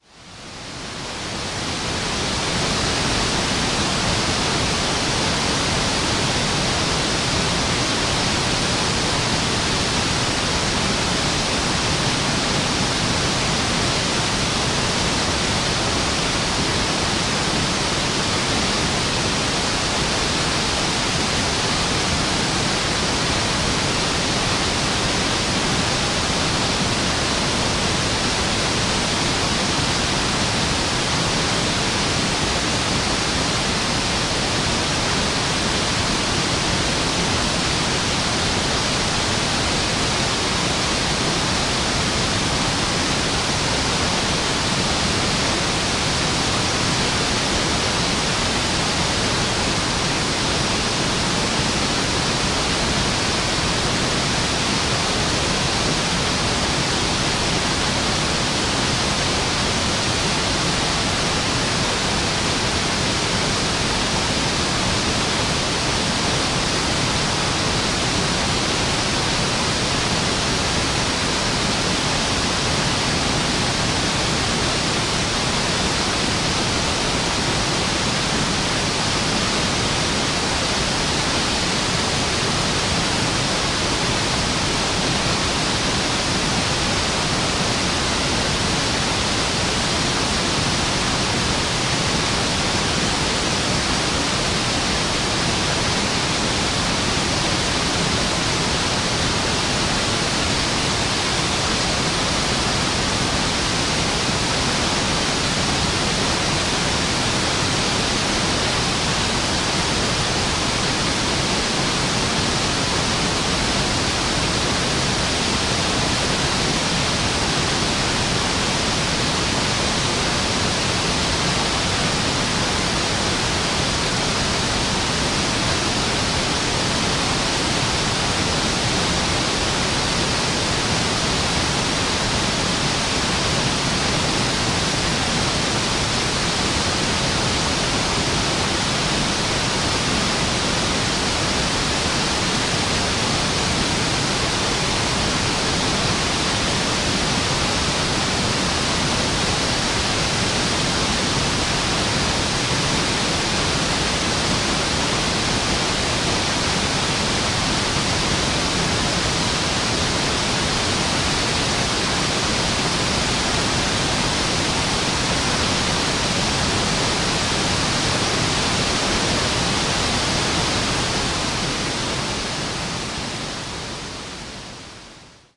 06.Falls-Of-Foyers-Lower-View-Point
Recording of the Falls of Foyers from the lower viewpoint.